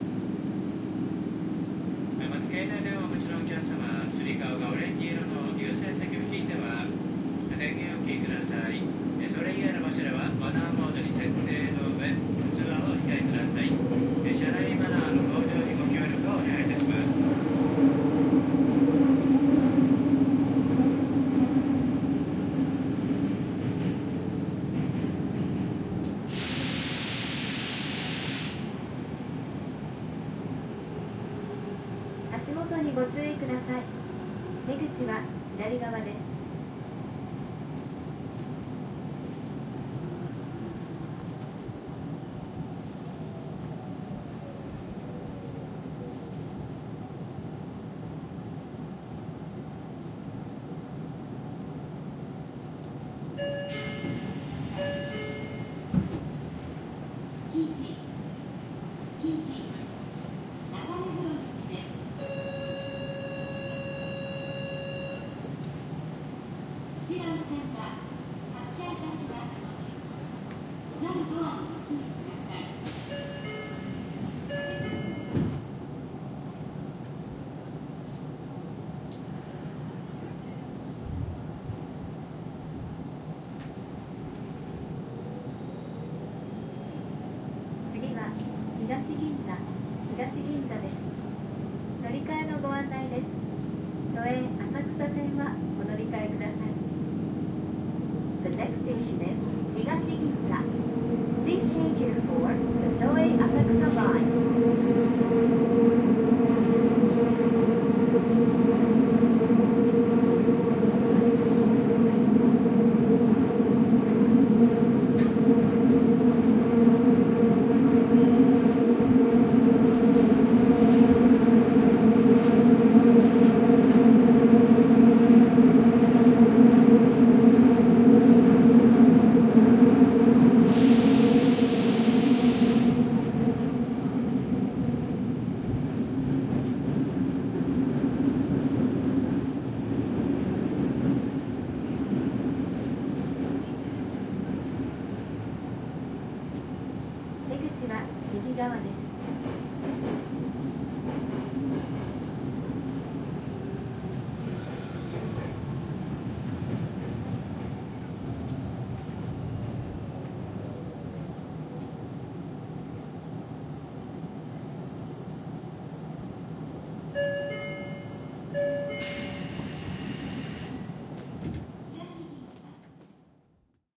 Tokyo - Train Interior
Recorded in May 2008 using a Zoom H4 on the Hibiya subway line. Contains male and female announcers. Stops at Tsukiji and Higashi Ginza stations. General atmos including doors opening and closing and roaring through a tunnel. Unprocessed apart from a low frequency cut.